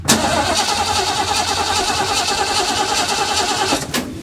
VHCL - Engine Turning Over v8
This is a very old recording of a V-8 van turning over and failing to start. I recorded this about 17 years ago on a Nagra III with a long forgotten Sennheiser short shotgun microphone. I just found it and tried to clean it up a bit (there's traffic noise in the background) to make it useful. I would have given more handle, but there is dialog immediately preceding and following this, so it's all I have.
car, drive, idle, vehicle